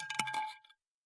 exploration of a coke bottle with contact mic and minidisc recorder. wobbling the bottle on a wooden floor.